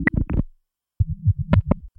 YP 120bpm Plague Beat A07
Add spice to your grooves with some dirty, rhythmic, data noise. 1 bar of 4 beats - recorded dry, for you to add your own delay and other effects.
No. 7 in a set of 12.
120-bpm, 120bpm, 1-bar, 4-beat, beat, dance, data, digital, drum, drum-loop, drum-pattern, drums, electronic, glitch, glitchcore, glitchy, idm, loop, minimal, minimalist, noise, percs, percussion, percussion-loop, percussive, rhythm, rhythmic, up-tempo, uptempo, urban